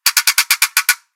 ratchet large08
Large wooden ratchet samples.